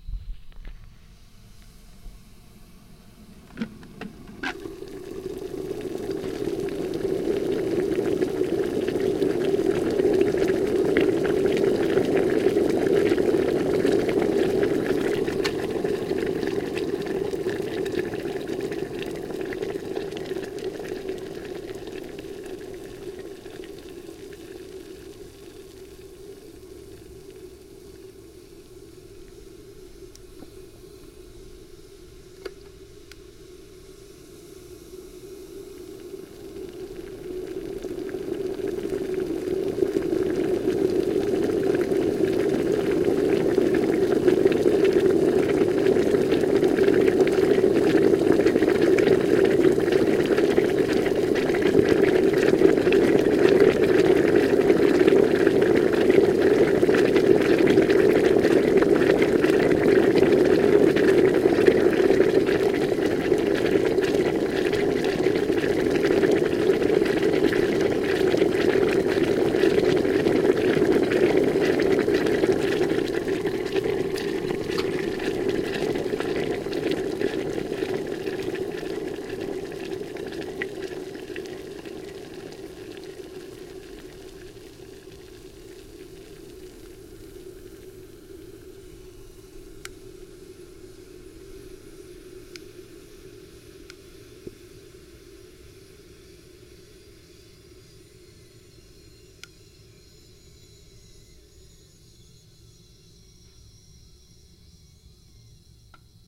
Waterboiler Starts to Boil
Waterboiler activated, water starts to boil, gets increasingly louder.
Recorded with Sony TCD D10 PRO II & Sennheiser MD21U.
switch, press, hot, bubbles, rising, pressure, warm, warmed-up, bubbly, boiler, bubble, tea, boiling, airbells, cooking, push, coffee, cooks, heat, warming-up, water, button, kitchen, cook, starts, boil, waterboiler, cook-point